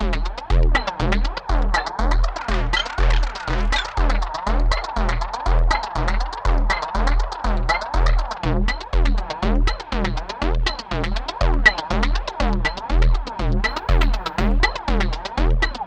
Zero Loop 2 - 120bpm
Distorted, Loop, Percussion, Zero